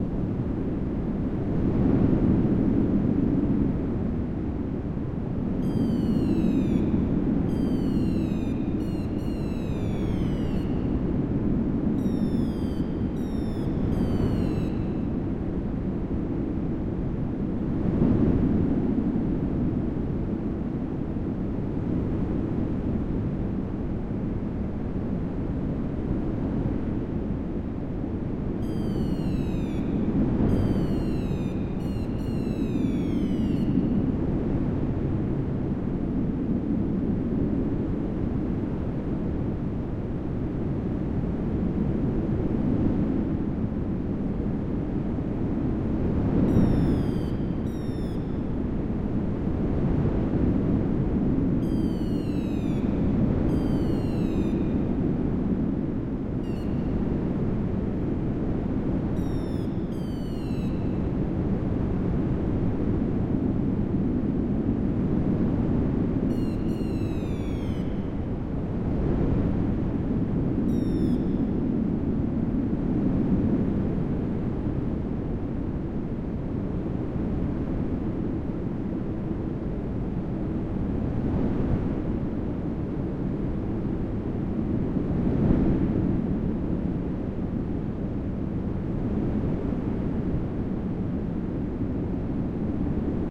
Far Ocean and Gulls
In Ableton's Operator instrument, I used a white noise oscillator with one Max for Live LFO modulating the LFO on the instrument, another MfL LFO modding the frequency cut off of its LPF and another LFO mapped to that same filter's resonance to emulate ocean waves and wind. All LFO depths are pretty shallow and set to random for a natural sound. The birds were an additional high frequency Saw Wave oscillator with a slow downward Saw Wave LFO with a HPF with a mid-range cutoff and slightly above midrange resonance. Everything was put through a Convolution Reverb in the end.
Ableton, beach, birds, coast, LFO, ocean, sea, seaside, shore, surf, synthesis, water, wave, waves, wind